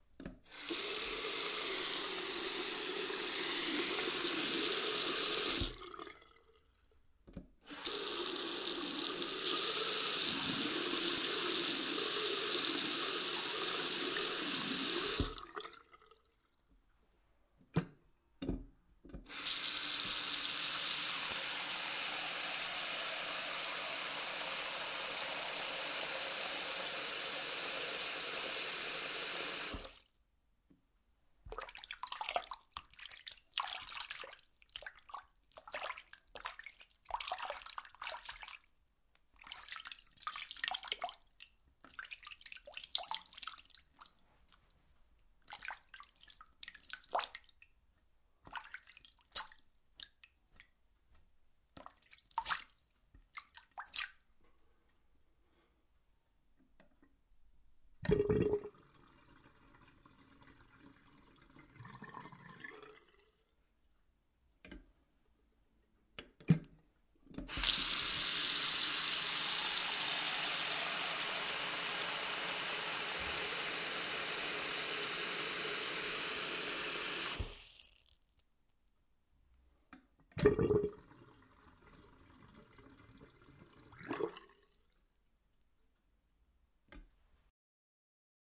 Bath sink water
BATH, WATER